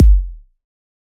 Good kick for techno, recorded with nepheton in Ableton.
kick, studio, recorded